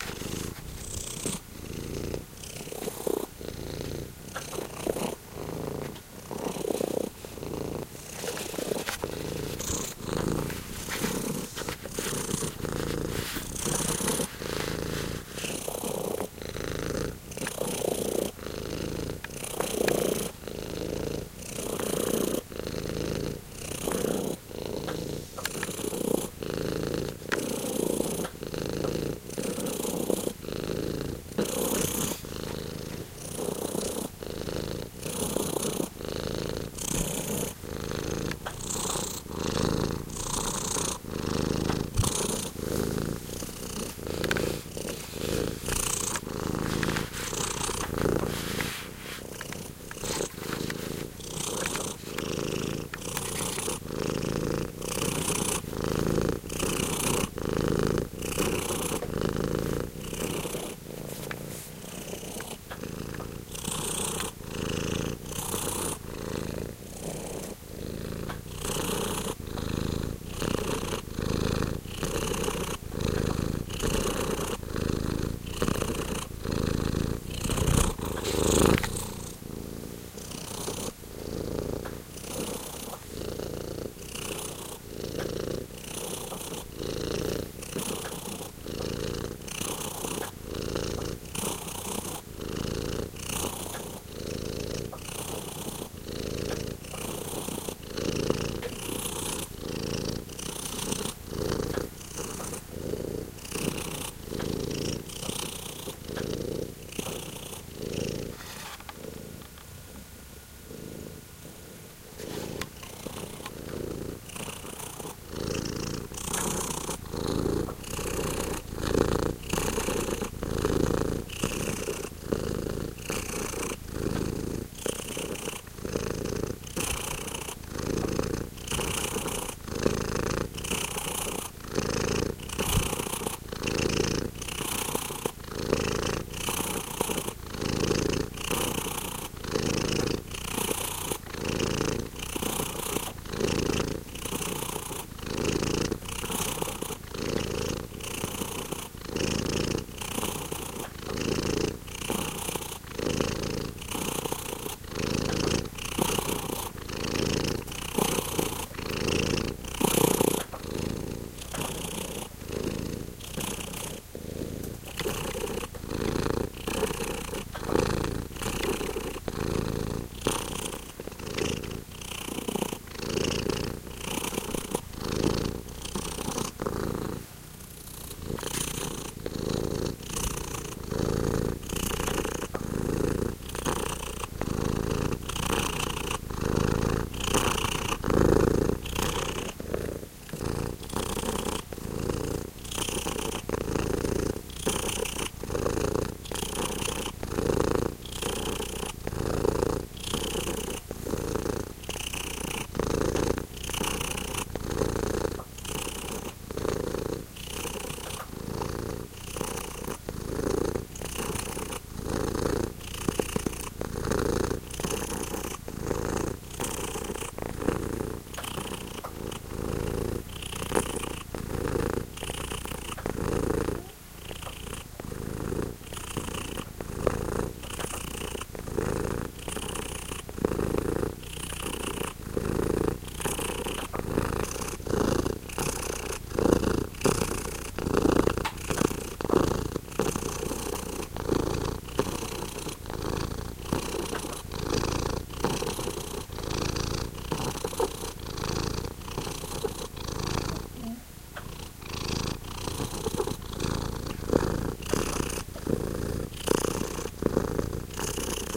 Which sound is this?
Cat purring
Cat, Close-up, purring, Stereo